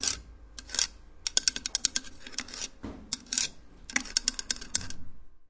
mySound GPSUK scraping&tapping
Galliard, UK, percussive, Primary